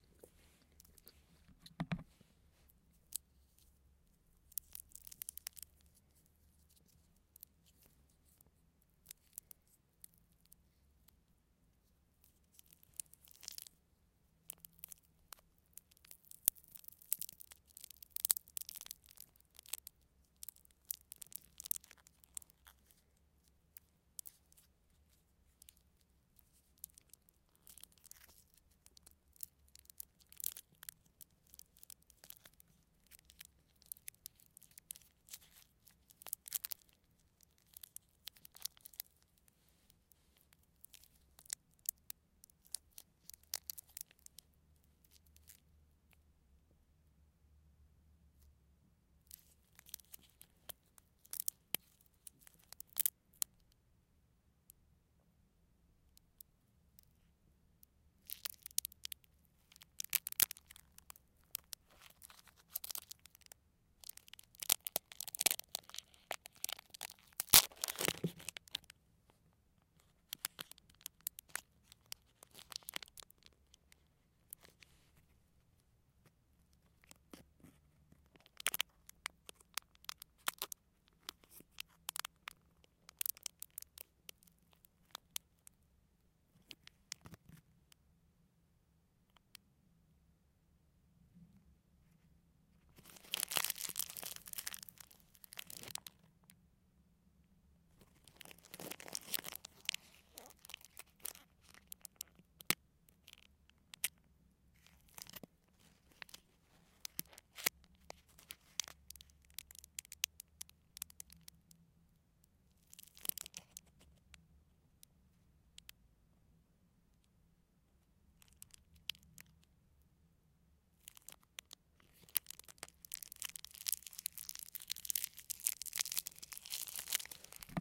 candy wrapper. slowly opening.
recorder with Zoom H2n.
candy, papiertje, plastic, snoep, wrap, wrapper, wrapping